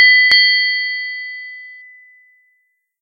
Ping Ping!
If you enjoyed the sound, please STAR, COMMENT, SPREAD THE WORD!🗣 It really helps!